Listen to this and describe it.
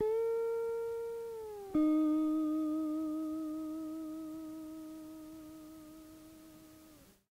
Tape Slide Guitar 16
Lo-fi tape samples at your disposal.
Jordan-Mills, tape